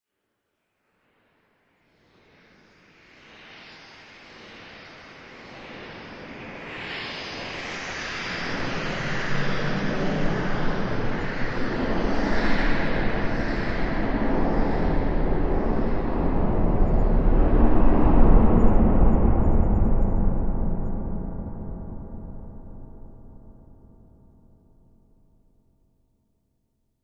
Two curious school teachers find a mysterious red wardrobe in a junkyard in 1960, only to discover it is actually Dr. When's time machine and get whisked away through time and space!
Inspired by Verity Lambert's hit BBC series "Love Soup", this sound effect was created by rubbing a key up and down a curtain pull and processing the sound.
It represents the sound of space and time being ripped apart as the impossible capsule materialises.